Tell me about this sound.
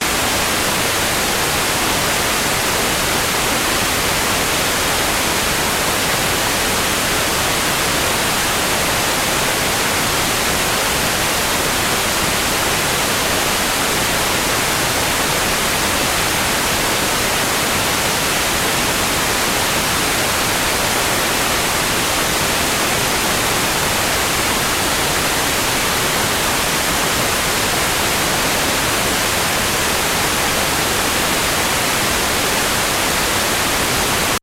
White Noise recorded in the 1970's Poland coming from speakers of a black and white television after broadcast ended at midnight.
Television, White, 1970, TV, Static